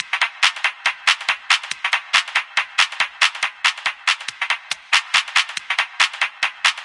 neurotic clap
A loop of off-beat claps with a military march feel.
clap, dark, hard, loop, march, millitary, neurotic, off-beat, pattern, percussion, processed